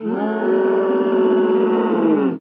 Elephant Scream (Fake)
An elephant screaming in anger of fear. It's actually human voices slowed down and mixed.